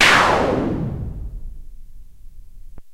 sfx-noise-sweep-02
Made with a KORG minilogue
effect, fx, game, sfx, sound, synthesizer